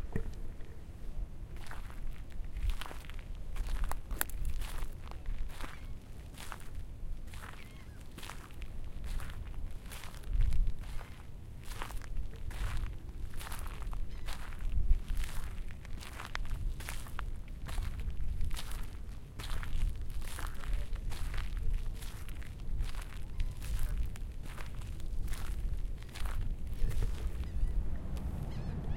steps, sand, river, NY 2
Footsteps on the gravel.
gravel, walk, footstep, sand, steps, walking, step, foot